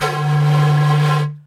Zanka Note D
D note of low pan flute Zanka.